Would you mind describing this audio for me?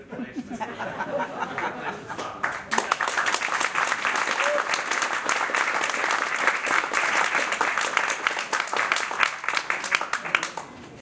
clapping and laughter
Small laughter and clapping recorded at an author event in portland OR. Recorded on Tascam DR07
laughter, people, clapping, public, crowd